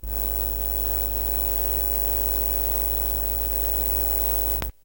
inspired by ryoji ikeda, ive recorded the sounding of me touching with my fingers and licking the minijac of a cable connected to the line-in entry of my pc. basically different ffffffff, trrrrrrr, and glllllll with a minimal- noisy sound...